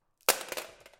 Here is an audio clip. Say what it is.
Sound of a computer speaker falling on the floor